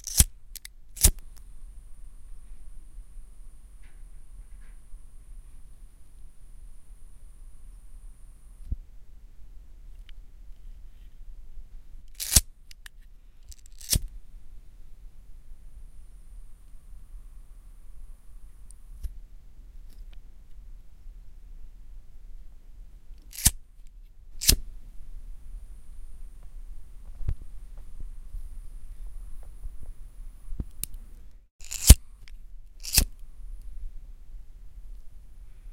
record of a lighter, can hear a fire )
cricket, lighter, fire, zippo